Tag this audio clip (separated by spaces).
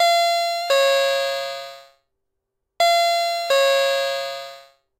1 door chime